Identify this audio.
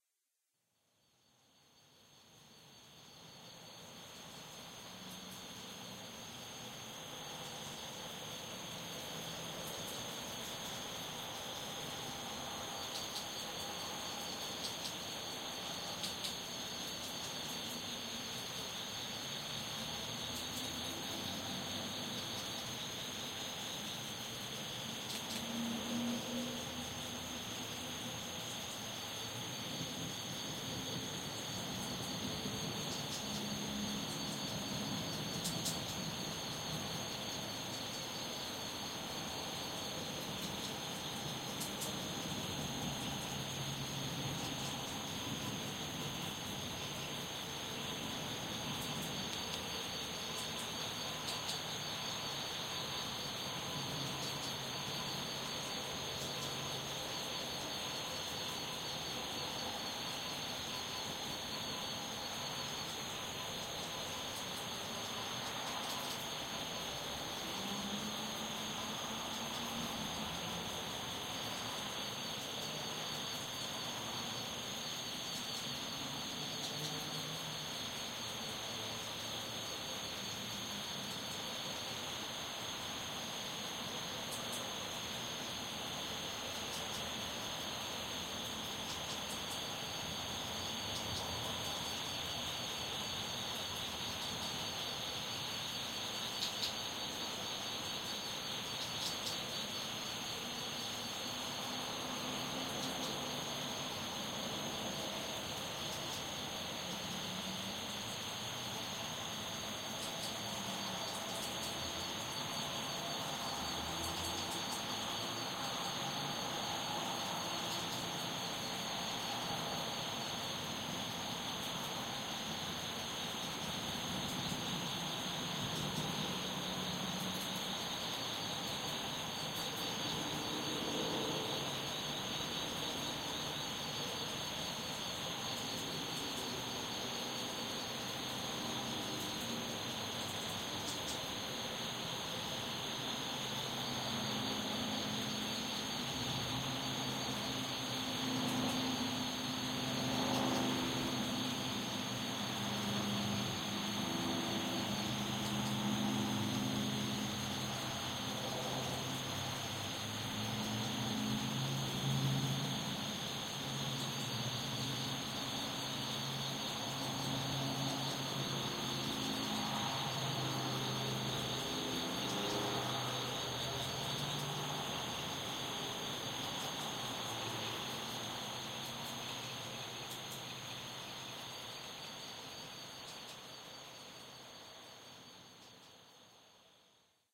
I recorded the end of a rain storm with water running off of the house and multitudes and species of frogs in the background.
I used my phone to record a mono track and then used audacity to simulate spatial stereo.
It made the traffic in the distance sound like spirits moaning.
I thought it was pretty cool.